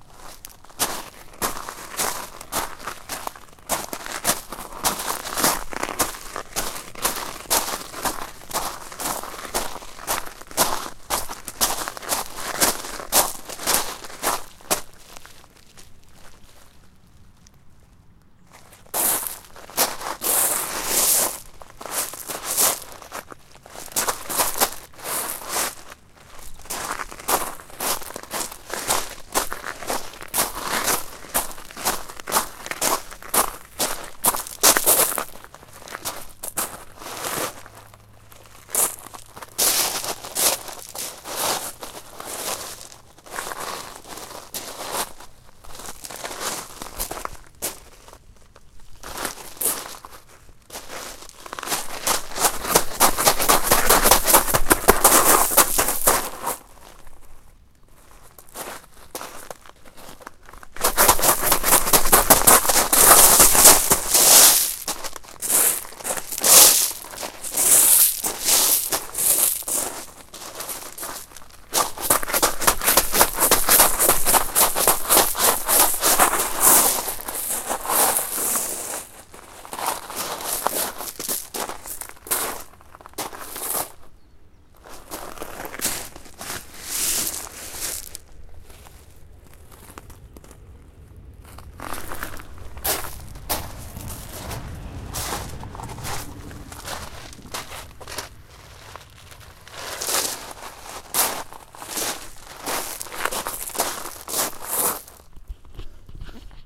sfx turnschuhe auf kieselsteinen 01
Walking on pebbles with sport shoes
pebbles, walking, steps, running